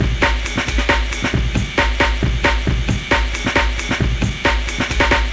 Be advised: this is not a 4/4 beat, but a 3/4 one (3 beats in each bar).
I created this because a Rave eJay sample. It was identified as a 180 BPM one, but it was a 135 BPM one with 3/4. Then I wanted to make a beat for it and whoomp! There it is!
It has been made with the amen break.